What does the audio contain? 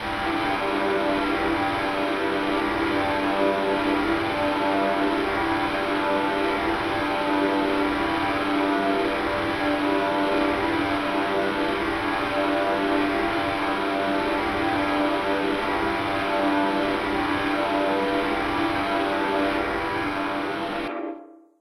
This is a drone created in Ableton Live.
I processed this file:
using Live's built in Ressonator effect (tuned to C) followed by an SIR (An Impulse Response) effect.
Ableton-Live, ambient, artificial, atmosphere, drone, harsh